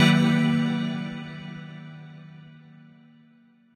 7 ca chord
a sharp hit with decay
chords, hits, samples, one, sounds, synth